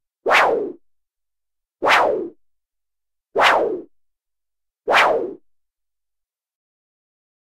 f Synth Whoosh 13
Swing stick whooshes whoosh swoosh
stick, swoosh, whoosh, Swing, whooshes